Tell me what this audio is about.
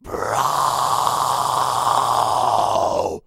High Growl recorded by Toni